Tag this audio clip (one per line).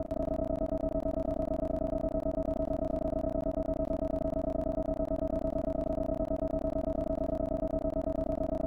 dialogue
high
text
video
blip
voice
games
sample
game